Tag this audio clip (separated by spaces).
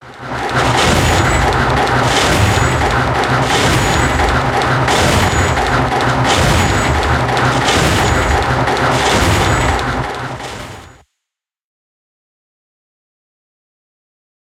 clacking metal piston rhythmic thumping